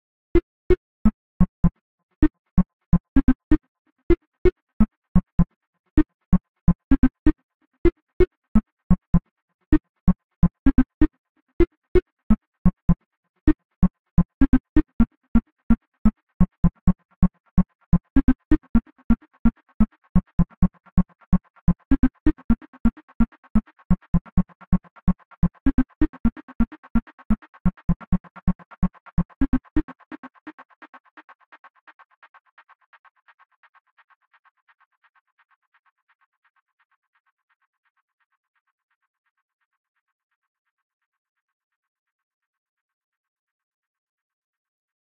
Wet pizza rat 2 (351998 lg steam-whistle-lo-fi)
Delayed distorted synth stab melody
delay, distant, dry, electric, electronic, loop, melodic, melody, remix, resample, rework, synth, synthesizer-loop, synthesizer-melody, synth-loop, synth-melody, trance